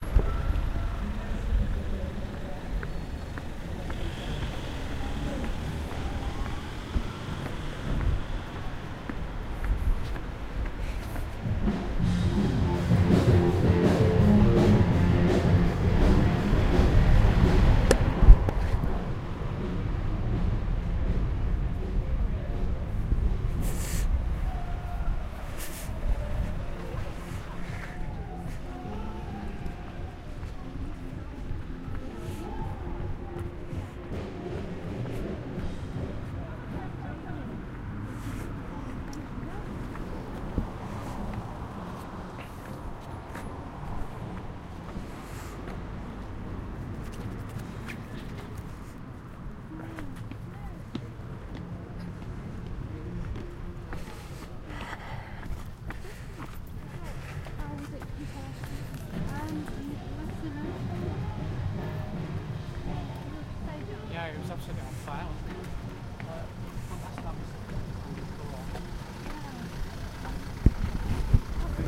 city ambience - loud band playing in pub
A loud band playing in a pub.
City, Live, Ambience, Music